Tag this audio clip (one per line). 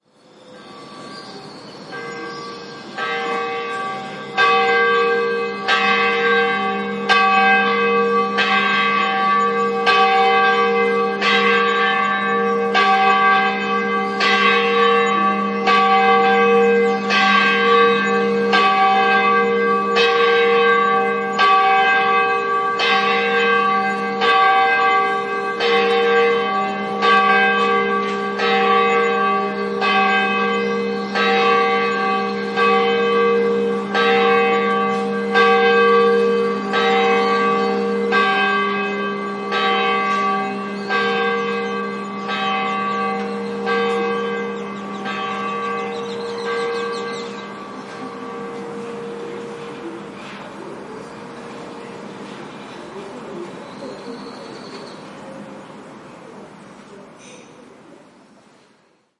bells
church
city
field-recording